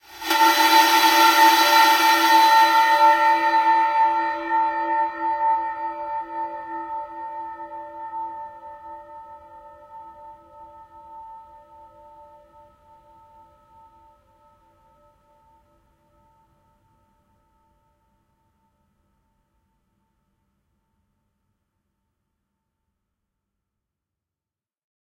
Cinematic Bell
An echoing climax effect.
Delay and reverb added in Audacity.
bell, cinematic, climax, echo, effect, ring, ringing, trailer, transition